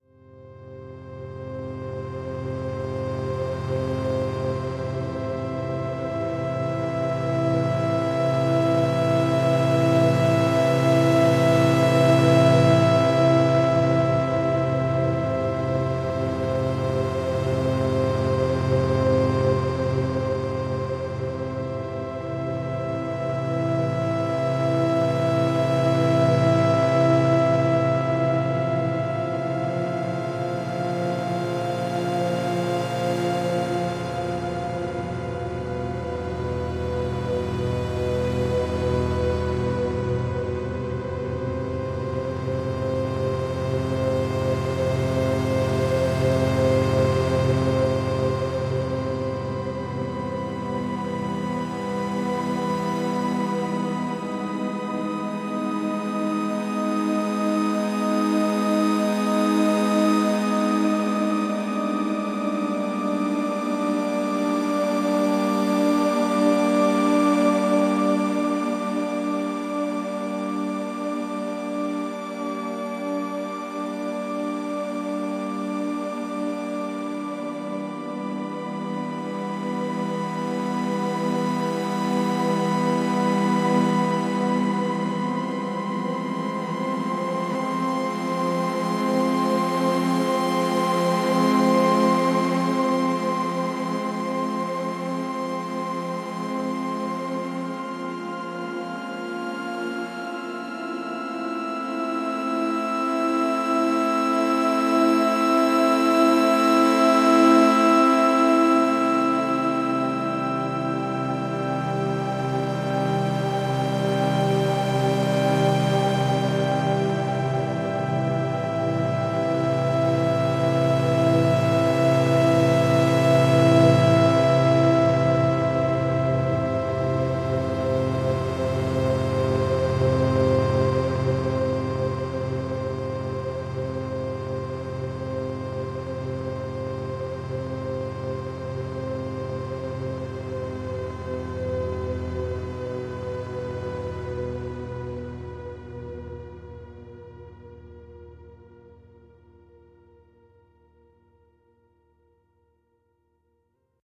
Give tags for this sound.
Ambient; Atmospheric; Dark; Scary; Stereo; Synth

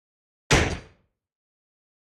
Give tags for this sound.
army; firing; gun; gunshot; military; pistol; rifle; shooting; shot; war; weapon

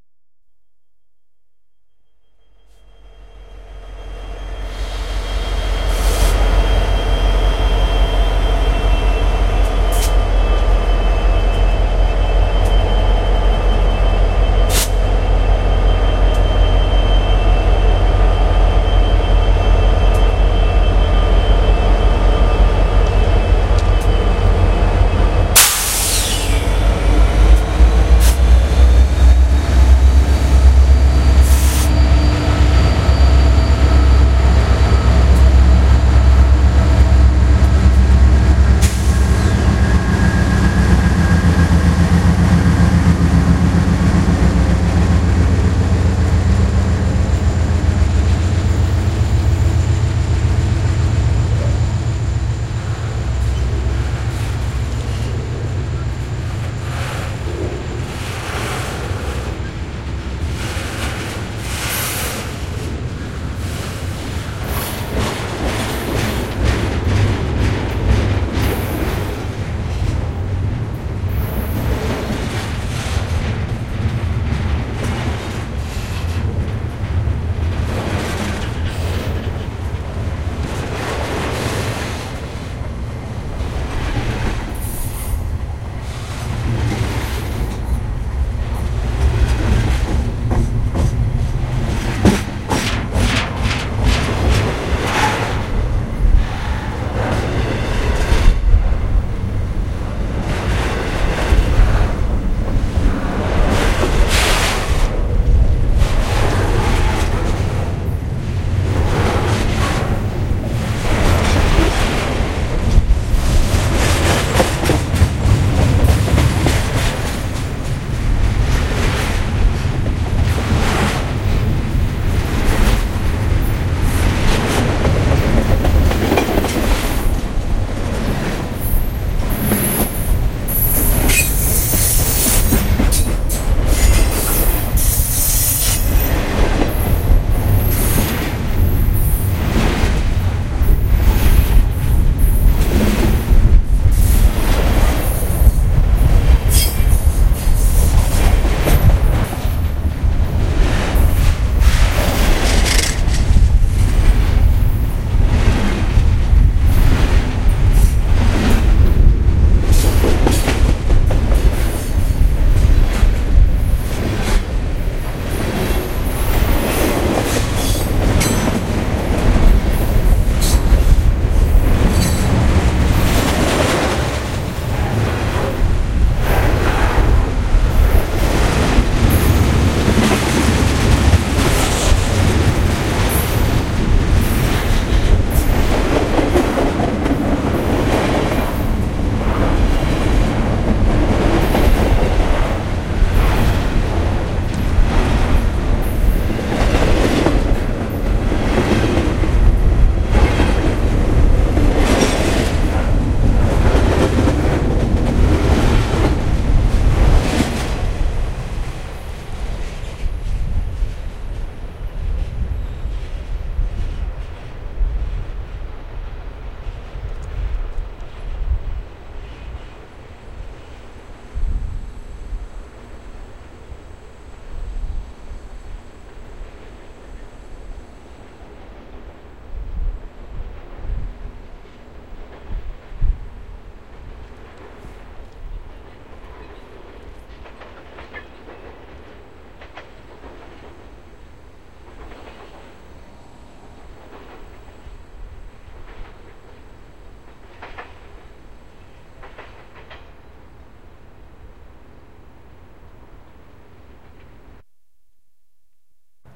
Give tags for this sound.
Train-acceleration; train-idle; solo-train; train-taking-off; train-idling; freight-train; single; train

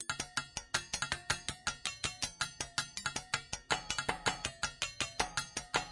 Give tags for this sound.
break; experimental; extended-techniques; hiss; trumpet

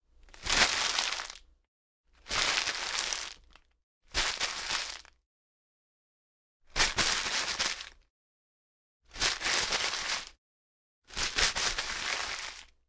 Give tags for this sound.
paper; crinkle; crinkling; crunching; smush; bag; crunch

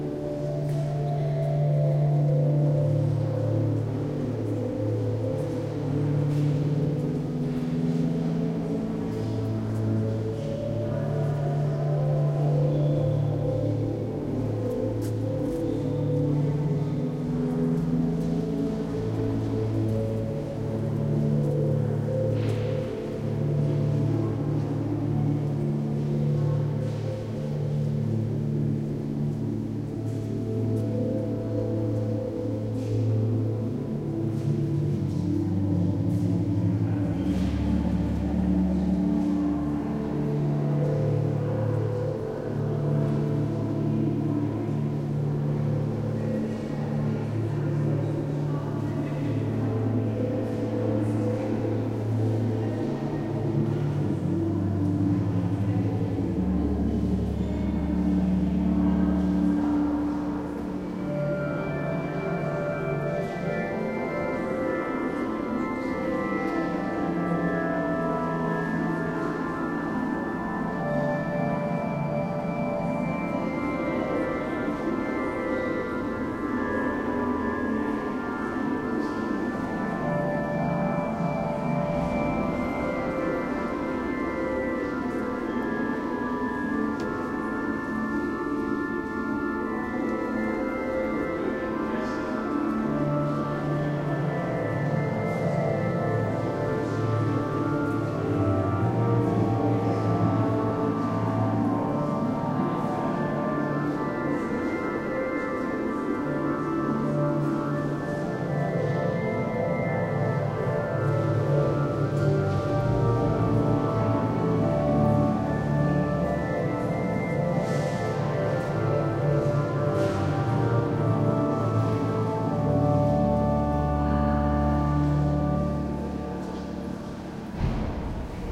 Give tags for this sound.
Organ; People; Field-Recording; Atmosphere; South; Hall; Walking; Music; Germany; Cathedral; Large; Tourist; Leisure; History; Architecture

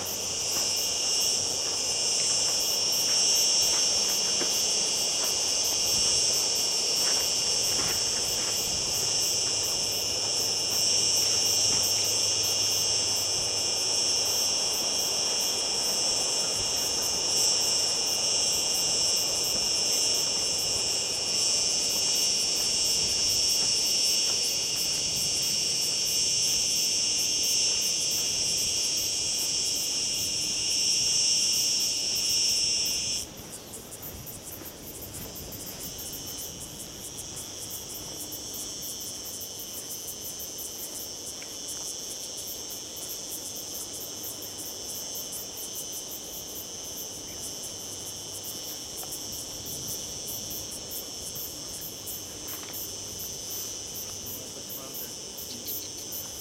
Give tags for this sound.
unprocessed; insects; heat; summer; donana; field-recording; cicadas; nature

